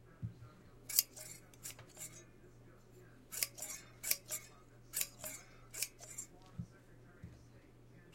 Rusty Shears
clipper, clippers, Rusty, scissor, scissors, Shears